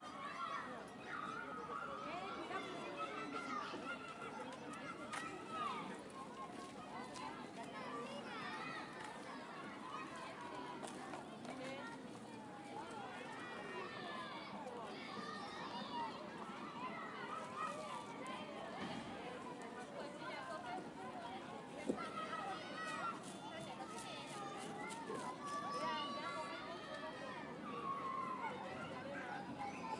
kids playing in a nearby park